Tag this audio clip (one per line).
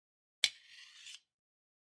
shield
metallic
iron
shiny
clang
blacksmith
slide
rod
metal
steel